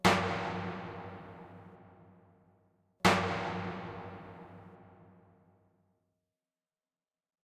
Davul(Greek ethnic instrument) Beat Recorded in Delta Studios. Double Beat.
Effect used: Deep Verb Double Space Designer